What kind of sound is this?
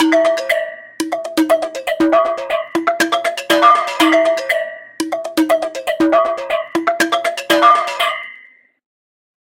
Pringle rhythm - Glass
hitting a Pringles Can + FX
delay, rhythm, resonant, rhythmic, loop, metallic, percussion